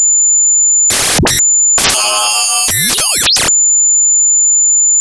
key to the dimension next door
Just a freaked out, slap-happy, electronic, glitch whack for your senses
- created with Argeïphontes Lyre